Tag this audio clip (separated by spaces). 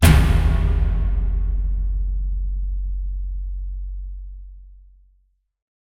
Hit Impact Metal